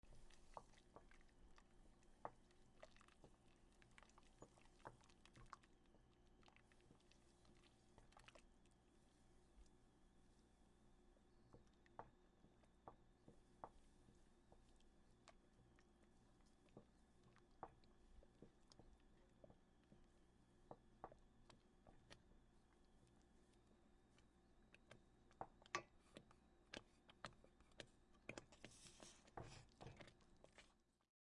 Stirring come Indian food while cooking on stove.